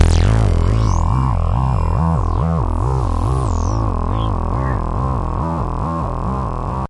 Hiphop/Grime Synth Loop
Bpm: 140
Synth, Synthloop, grime, hip, hiphop, hop, loop, rap